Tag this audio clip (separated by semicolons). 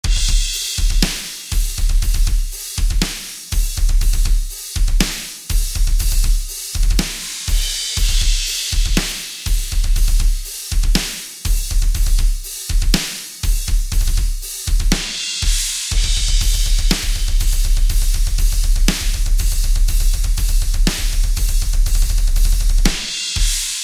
Drum-Set; Compressed; Mastering